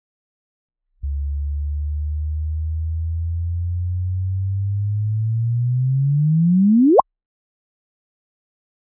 GW170817 (Template)
The Gravitational Wave Signal GW170817 (Only the gravitational chirp)
Riser, Sine, Sweep